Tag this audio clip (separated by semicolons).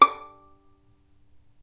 violin; non-vibrato; pizzicato